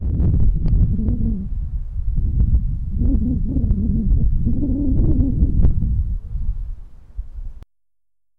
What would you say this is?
Sound generated by the appearance of the air in outdoor. Hard intensity level.